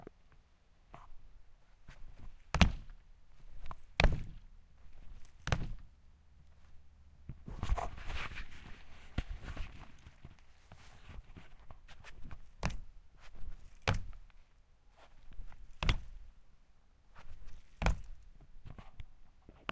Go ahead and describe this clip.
jump land wood
Jumping and landing on wood floor. Recorded on an Olympus LS-P4.